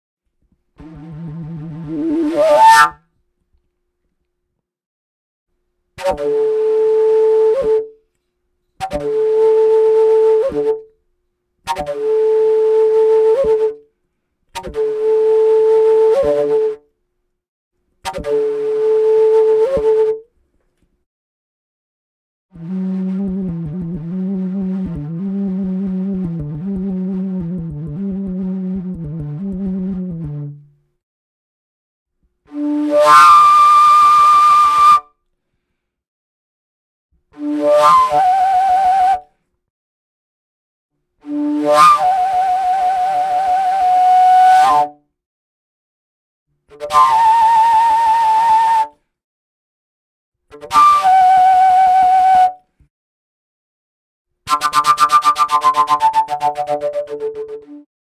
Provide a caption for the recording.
pvc fujara samples 3
Some phrases and swooshes, etc. of PVC fujara flute in D
ethnic-instruments, fujara, overtone-flute, overtones, pvc-fujara, sample, woodwind